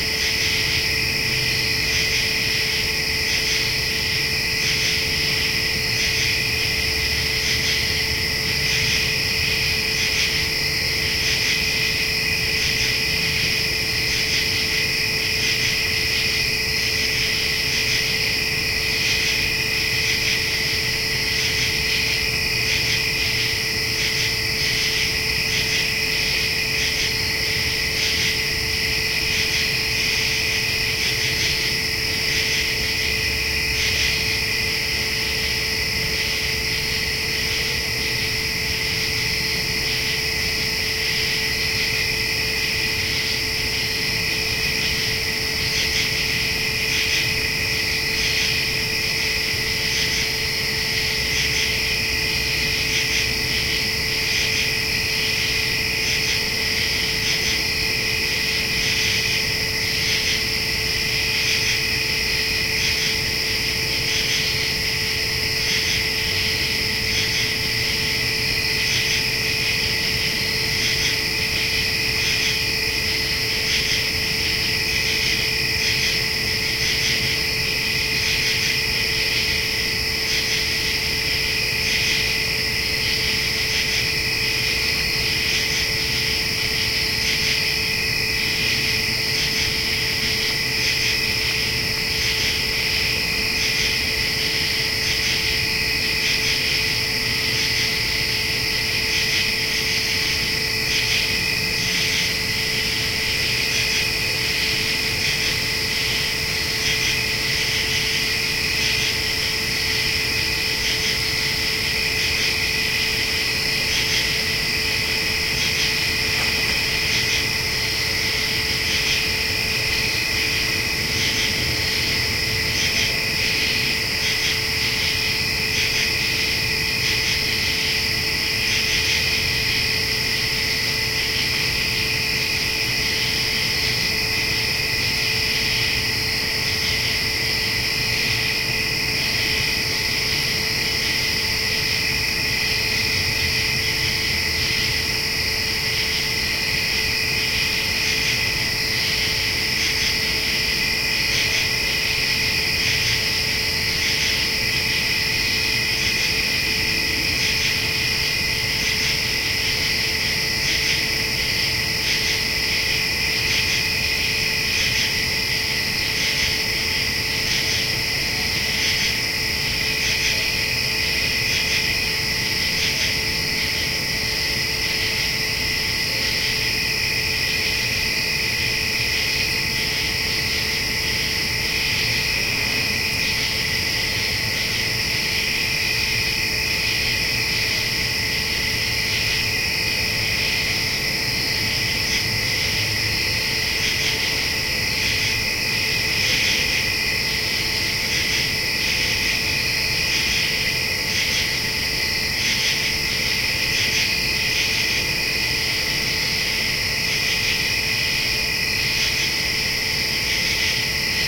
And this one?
Nighttime ambiance with lots of bugs (mostly cicadas, I think). Recorded in stereo.
cicadas, field-recording, night